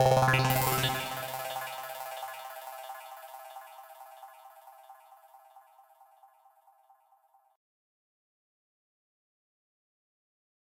chesse whirl
simple fx shot
fx, shot, sweep